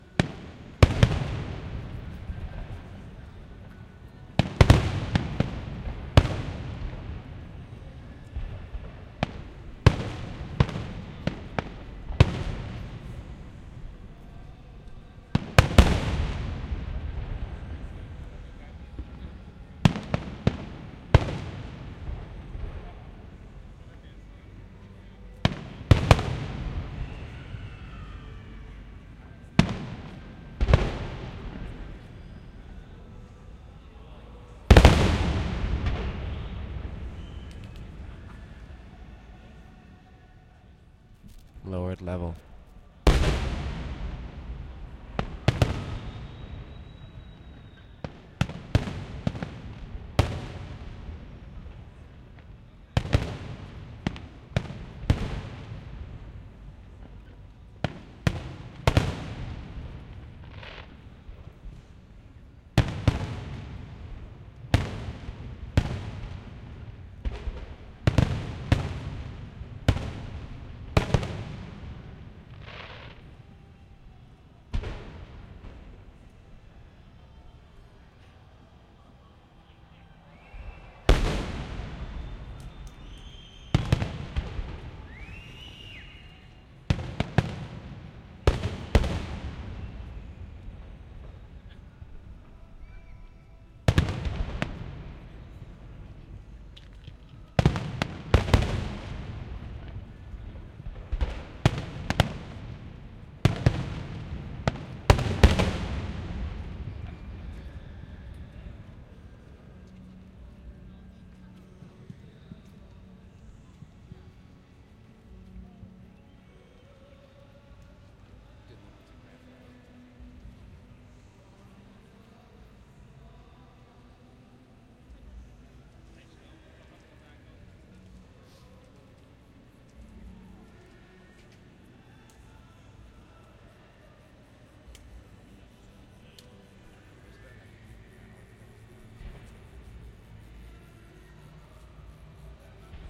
fireworks small pops Montreal, Canada
pops, small, fireworks, Canada, Montreal